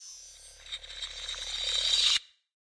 Tweaked percussion and cymbal sounds combined with synths and effects.
Abstract, Beam, Laser, Percussion, Phaser, Sound-Effect, Space, Stream